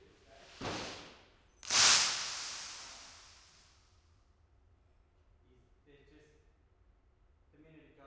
glass drop malthouse too
windscreen glass dropped from seven meters hitting the ground
effect, glass, sound, tinkle